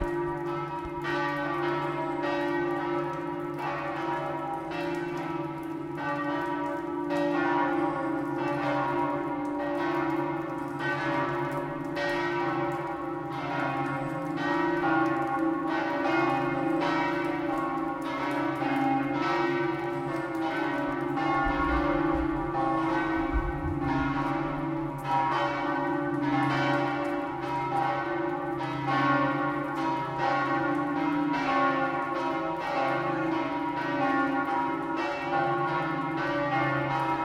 Piazza San Marcos Bells and Rain
H1 Zoom. Rainy day 9 am bells and crowd in Piazza San Marcos.
Rain, bell, Field-Recording, ringing, Crowd, chimes, ring, bells, Ambience